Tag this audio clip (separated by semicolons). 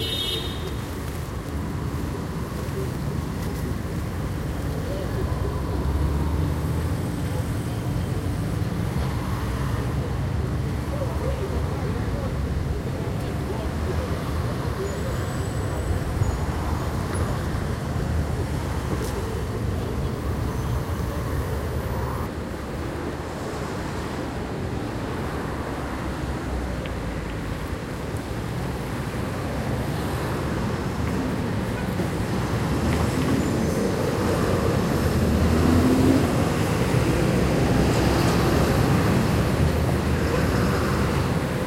city; field-recording; sound-effect; traffic